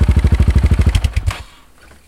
Motorcycle engine stop
Stopping the engine in my Yamaha mt-03 single cylinder.
stereo, engine, yamaha-mt03, stop, motorcycle, field-recording, tascam